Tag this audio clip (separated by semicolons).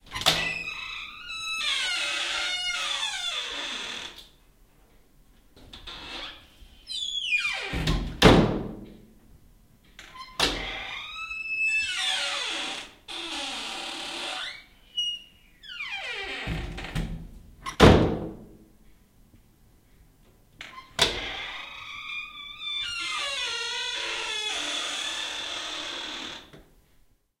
sauna; creaky; door